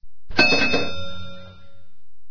routemaster bell nice
Routemaster bus bell
london, old, routemaster, bus, bell